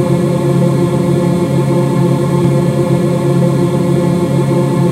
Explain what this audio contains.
Created using spectral freezing max patch. Some may have pops and clicks or audible looping but shouldn't be hard to fix.
Background, Everlasting, Perpetual, Still